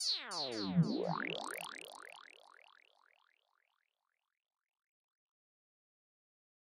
Diversion Squelch 1 Phase 2
Pretty self explanatory, a classic psy squelch :)
fx, acid, digital, sfx, future, sci-fi, Psytrance, effect, synth, psy, squelch, psychedelic